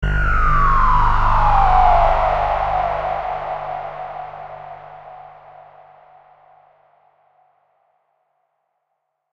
space synth1
One note space synth sound